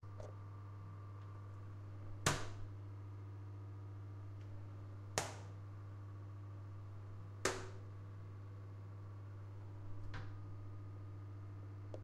This is the sound of a toilet opening and closing.